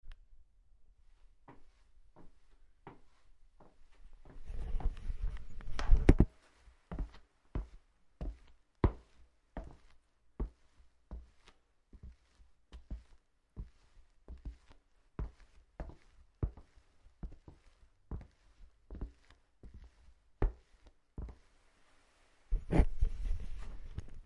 Footsteps on Wooden Floor